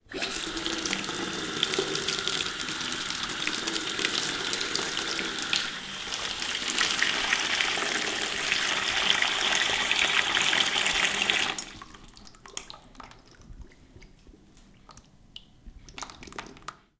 water into plastic bucket faster
Filling a plastic bucket with water from a faucet. Faster version.